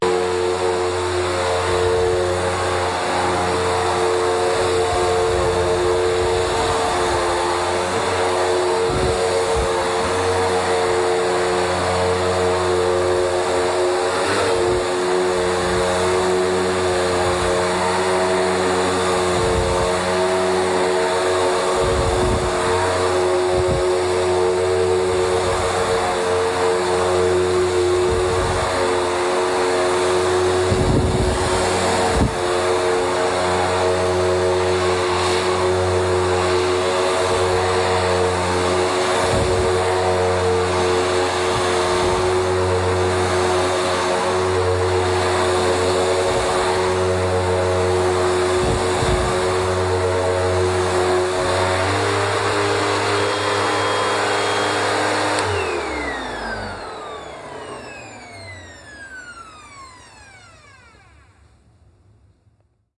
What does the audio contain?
Recorded a vacuum running.